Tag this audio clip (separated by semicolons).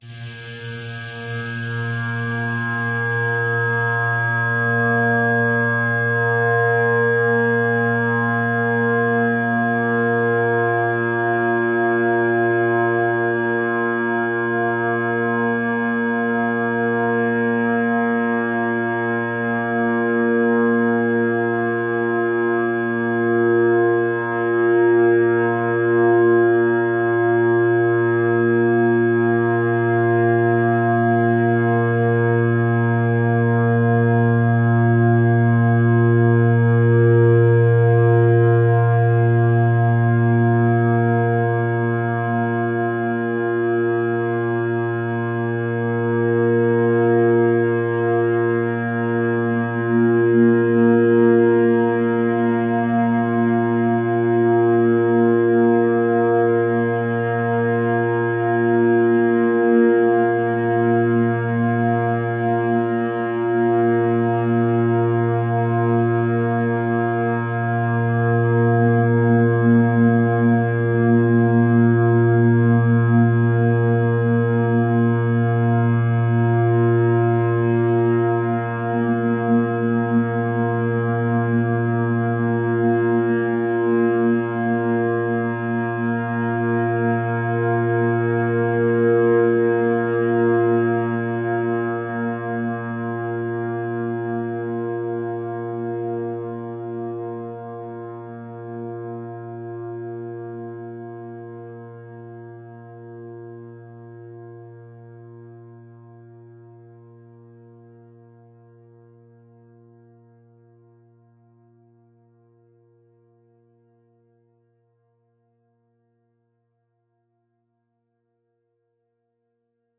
overtones; ambient; multisample; pad